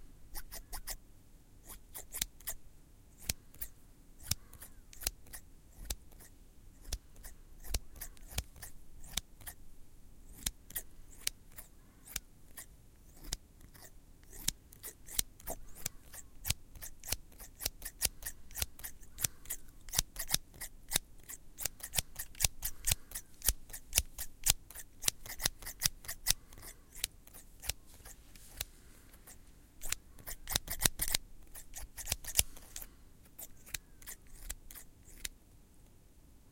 Recorded with: Zoom H6 (XY Capsule)
Asmr of a scissor being opened and closed with high gain.